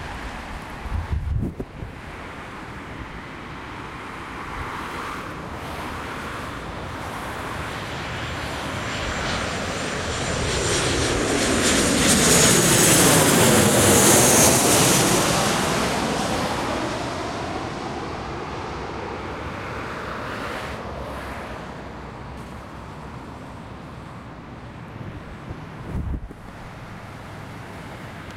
airplane passing over an avenue
airbus, airplane, flight